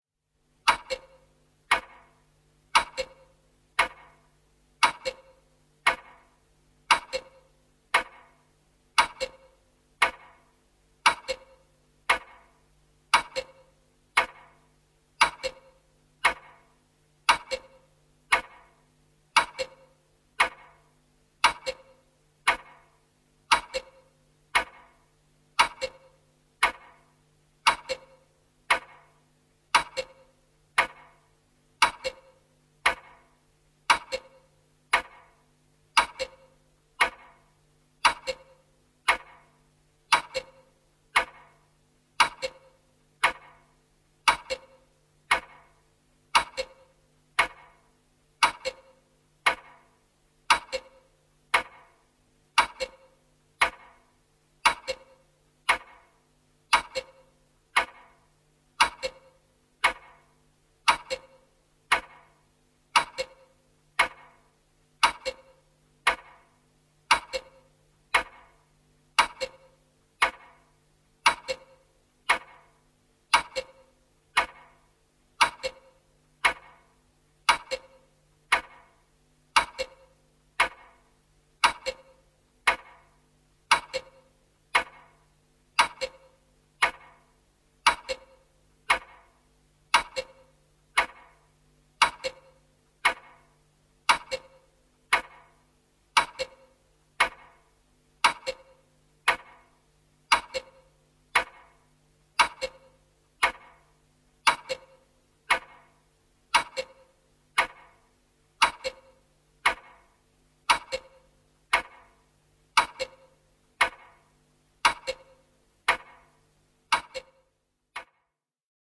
Kaappikello, tikitys, käynti / Grandfather clock ticking, 3-part ticking, slightly fixed old recording
Kello käy, raksuttaa rauhallisesti. Kolmiosainen tikitys. Hieman korjailtu vanha äänitys.
Paikka/Place: Suomi / Finland / Helsinki
Aika/Date: 10.03.1961
Clock, Finland, Finnish-Broadcasting-Company, Grandfather-clock, Kaappikello, Kello, Kellot, Longcase-clock, Soundfx, Suomi, Tehosteet, Tick, Ticking, Tikitys, Yle, Yleisradio